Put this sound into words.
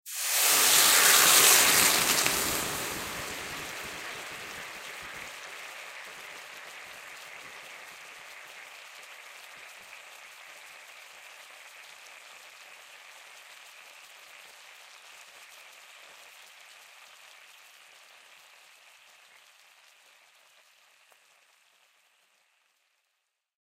Intense Sizzling 5
The sound of water being poured onto a pre-heated frying pan, creating a intense sizzling noise.
Recorded using the Zoom H6 XY module.
sizzling, water, heat, fizzing, hissing, burning, boiling, bubbling, hot, liquid